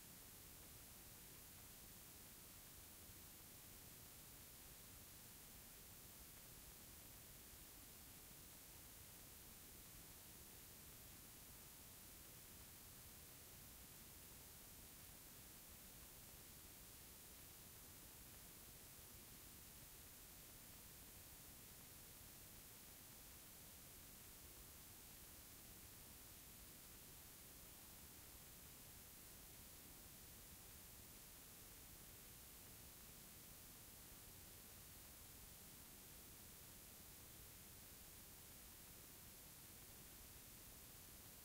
Tape hiss (clicky)
Sound output from playback of an old blank/empty type 1 audio tape that has quite consistent noise with some small clicks and pops. The volume hasn't been adjusted, so this is true to the amount of noise present in a tape recording.
blank, tape, cassette-tape, static, noise, tape-recording, empty